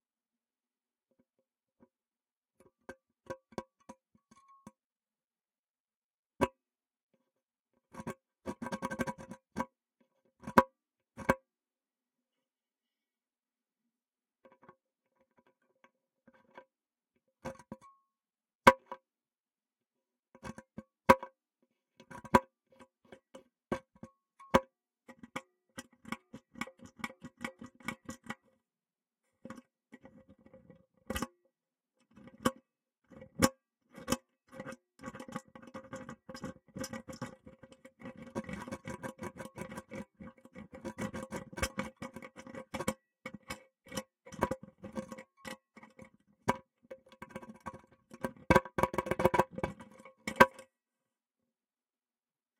delphis SPRING SWITCH 1
Selfmade tools where the microphone is placed into it. Mics Studio Projects S4 and RAMSA S1 (Panasonic). Record direct into Cubase4 with vst3 GATE, COMPRESSOR and LIMITER. Samples are not edit. Used pvc pipes, guitar strings, balloon, rubber, spring etc.
pipe, s4, spring